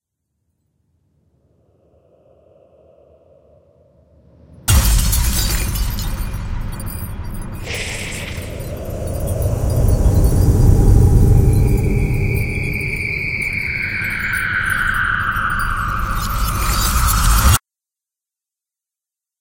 ghost out of mirror
a ghost comes out of a mirror, for a stage production I'm working on.
klankbeeld's "horror ghost 38" and "horror ghost 39"
wolfsinger's "weirdbreath"
and themfish "glass house2" (which in turn is a combination of Alcove Audio's "BobKessler-Break Glass", schluppipuppie's "tsch - 02", "Glass Break" 1 through 5 from RHumphries "glass pack", gezortenplotz's "magic_mirror_crash", and HerbertBoland's "CinematicBoomNorm")
the sounds were edited with Audacity
spooky, drama, horror